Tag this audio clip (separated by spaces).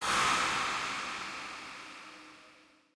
game killed monster rpg videogame death enemy